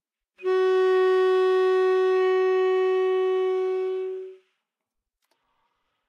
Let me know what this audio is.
Sax Tenor - F#4
Part of the Good-sounds dataset of monophonic instrumental sounds.
instrument::sax_tenor
note::F#
octave::4
midi note::54
good-sounds-id::5020
neumann-U87, good-sounds, Fsharp4, single-note, sax, multisample, tenor